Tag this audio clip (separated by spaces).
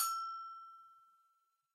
bells percussion samba